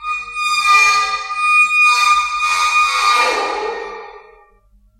The stools in the operating theatre, in the hospital in which I used to work, were very squeaky! They were recorded in the operating theatre at night.
stool, hospital, metal, percussion, friction, squeak